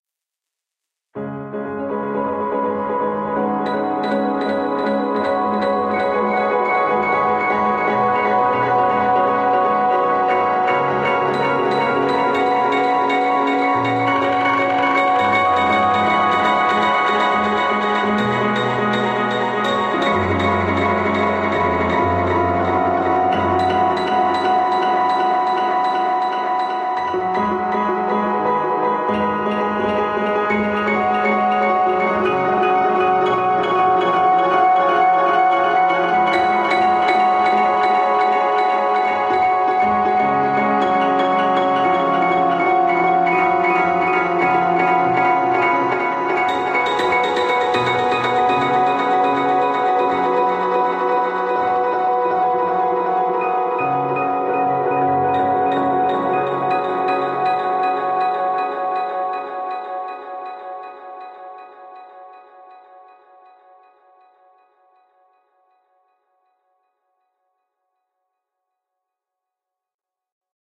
Glitched Piano
Piano Glitch Delay